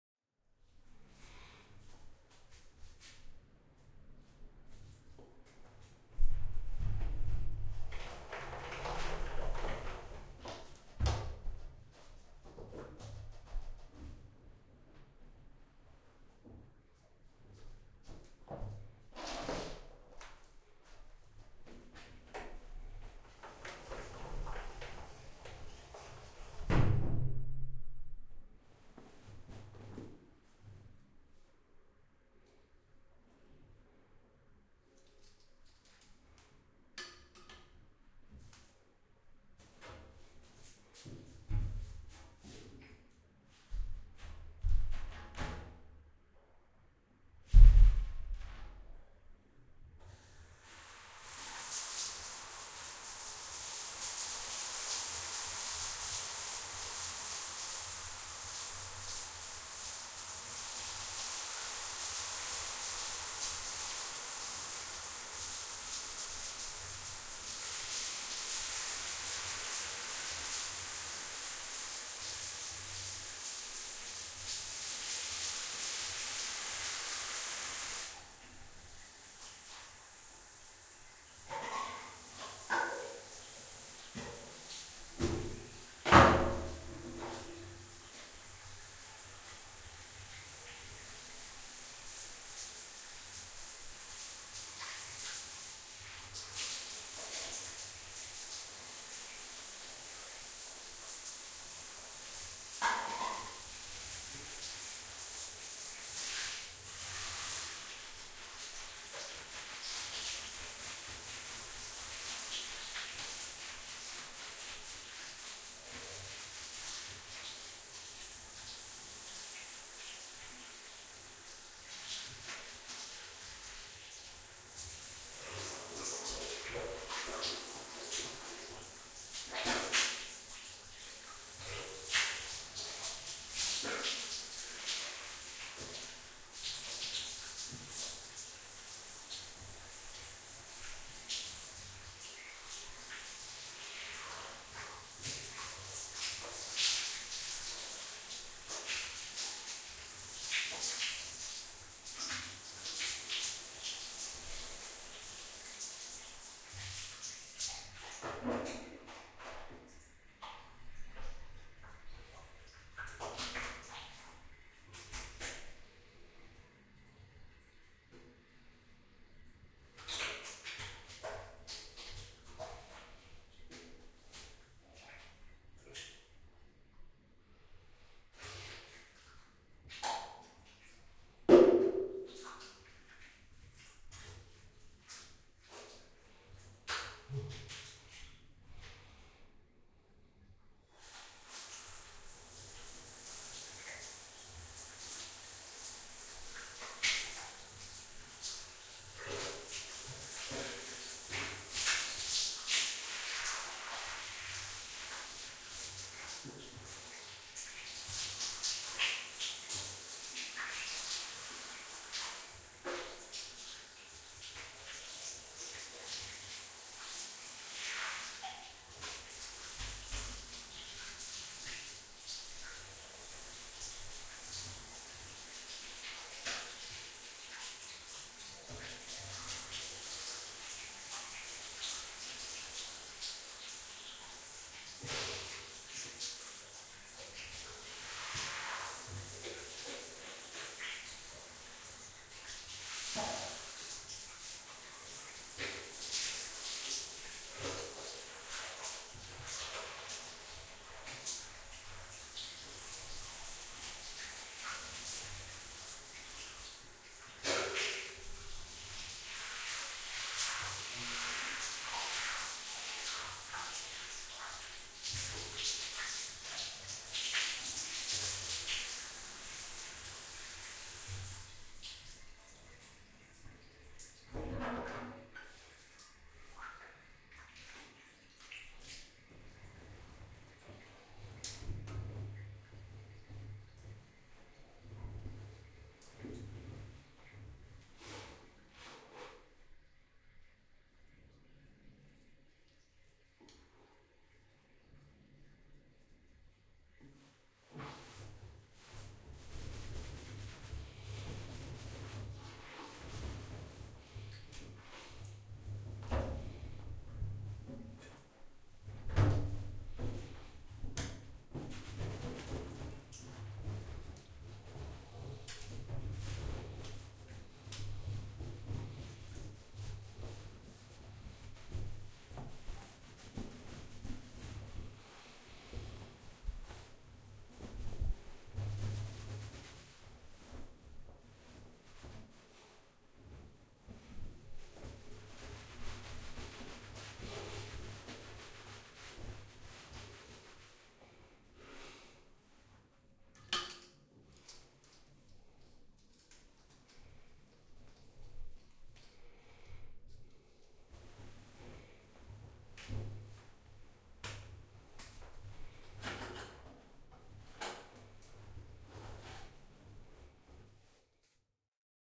Me taking a bath.
bath, drip, shower, splash, water, wet